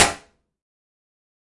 Metal Sheet Hit
A sharp metallic percussion sound from me hitting a sheet of steel with a steel implement.
[Key: ]
Closed, Drum, Drums, Field-Recording, Hat, Hi, Hi-Hat, Hit, Impact, Metal, Metal-Impact, Metallic, Metal-Perc, Metal-Percussion, Oven, Perc, Percus, Percussion, Sample, Sharp, Snare, Steel, Steel-Hit